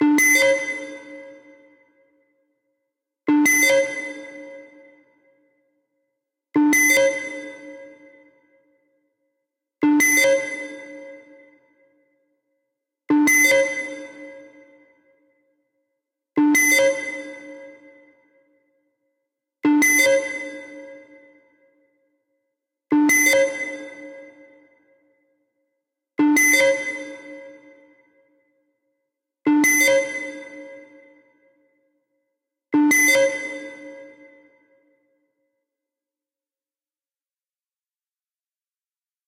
Future Alarm
Alarm SFX.
Created with Max For Cats Pallas.
Added some space with reverb.
Created just for fun.
Hope u like it.
future, Synthesis, war, disaster, sos, alert, Alarm